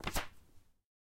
Page Turn 01
08/36 of Various Book manipulations... Page turns, Book closes, Page